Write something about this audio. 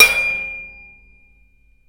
Large square metal road sign struck into the edge with a 2' piece of metal electrical conduit. Low frequencies come from the sign, high frequencies from the metal conduit. The sort of sound you might hear in "Stomp".